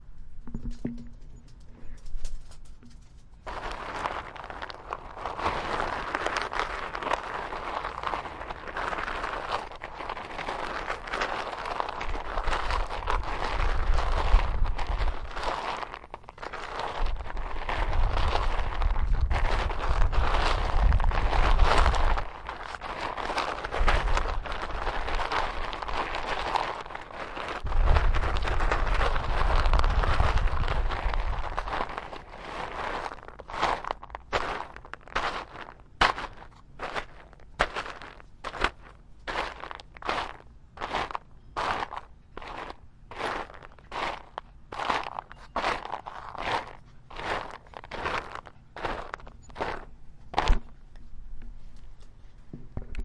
This is the sound of Car Parking on Gravel or Man Walking on Rocks.Foley Sound.Using various objects such as rocks, and cardboard paper. And as usual have fun filming!
Recording Tech Info:
Zoom H1
Stereo
Low-cut Filter:Off
Car, dirt, Foley, footsteps, gravel, ground, man, parking, pebbles, rocks, snow, sound, steps, walk, walking